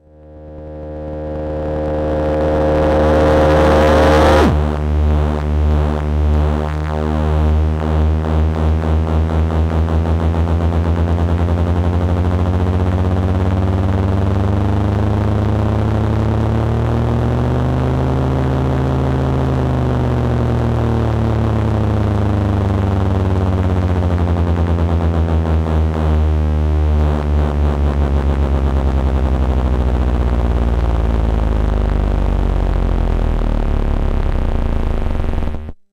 make noise 0-coast sound